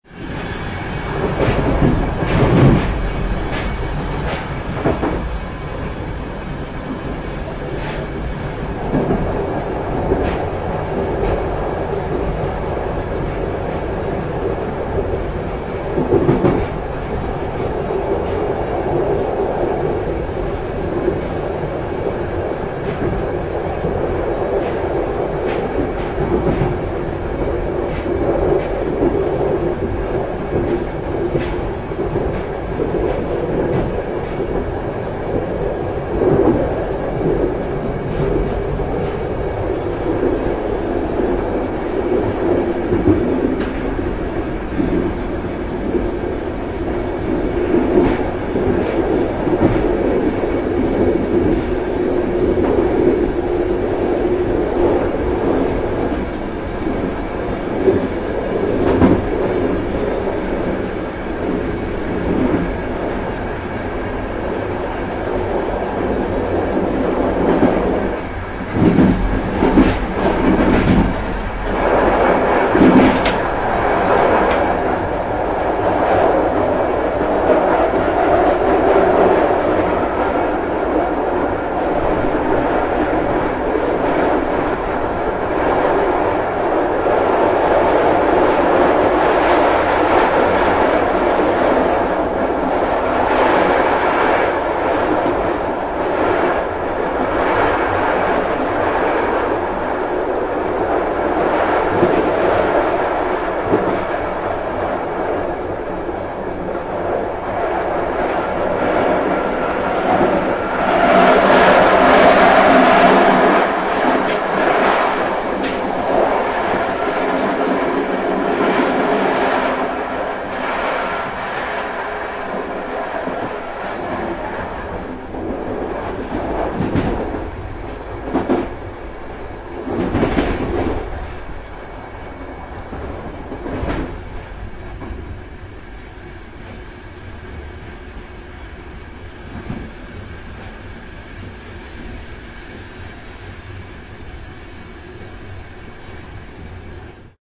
intercity train ride
train, railroad, ride, rail, rails, intercity